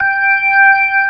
real organ slow rotary